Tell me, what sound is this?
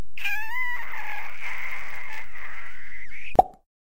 This combined sound reminds us when we are getting too maudlin, or can be used to advise someone else to take their heads out of their a,,es.
cartoon-kiss, kiss-pop, kiss